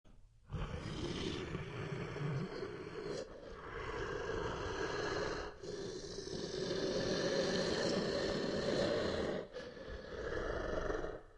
Demon growl 3
demonic, sounds